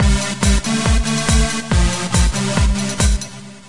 Simple loop made in FL studio. This is my first attempt in making a loop. Short melody with a basic beat. (please don't trash me this is my first try in my life)
melody, beat